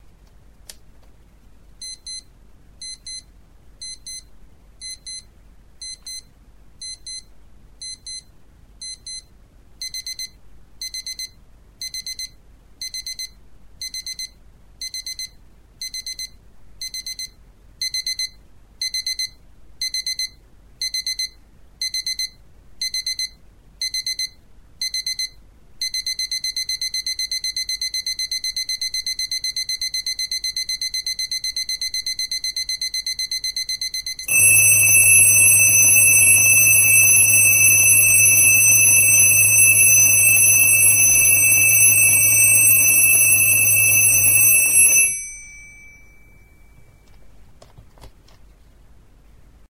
CasioLMN-Zoom-h2
compression, sample